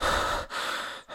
Breath Scared 17
A male agitated scared single breathing sound to be used in horror games. Useful for extreme fear, or for simply being out of breath.
epic, game, gaming, video-game, videogames